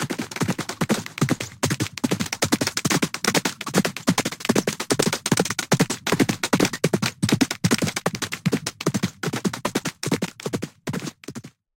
This is a digital mastered single horse galopp.
run, gallop, horse, Galopp